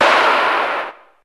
progressive psytrance goa psytrance
goa, progressive